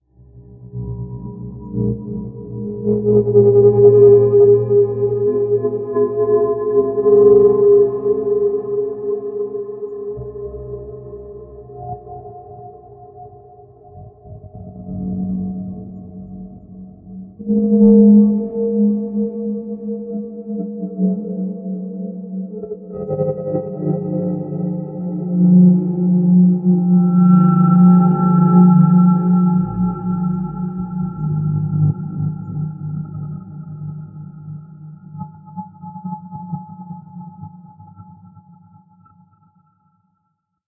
Samurai Jugular - 29
A samurai at your jugular! Weird sound effects I made that you can have, too.
effect, sci-fi, sound, sweetener